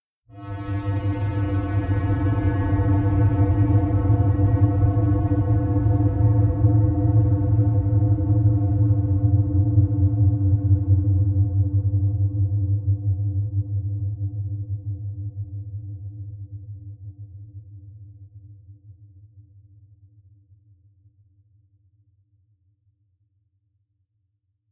eerie bell
A kinda creepy bell sound that i made in audacity
ringing, bell, metalic, clang, clanging, gong, bong